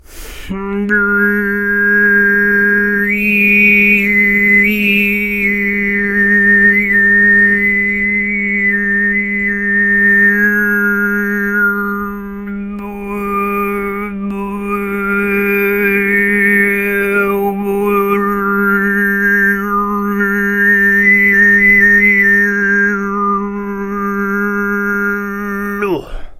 alfonso high 15
From a recording batch done in the MTG studios: Alfonso Perez visited tuva a time ago and learnt both the low and high "tuva' style singing. Here he demonstrates the high + overtone singing referred to as sygyt.
high, overtones, singing, sygyt, throat, tuva